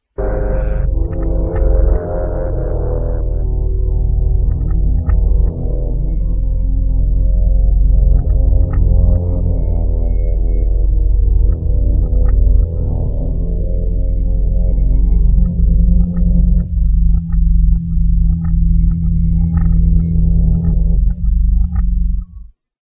Subterranian Raw 2017
For me, this conjures an almost malevolent prehistoric setting. As if one suddenly chances upon a window in time and scans a dark, sulphorus volcanic landscape, complete with lava pit noises.
It's actually a slow speed reversed guitar from a heavily re-used reel-to-reel tape from 1984.